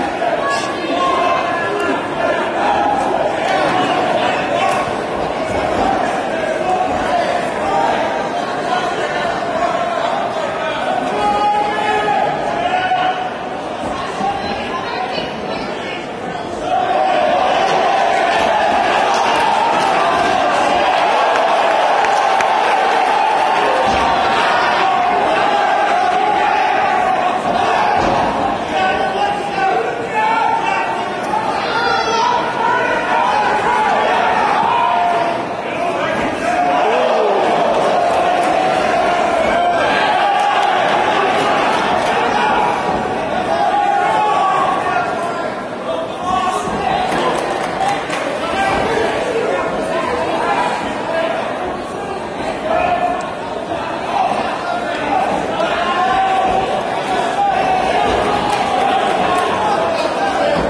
Fight Arena 3
Crowd noise at a MMA fight. Yelling and English cheering sounds. Part 3 of 5.
arena, boxing, cheering, clapping, crowd, english, event, field-recording, fight, fighting, live, shouting, wrestling, yelling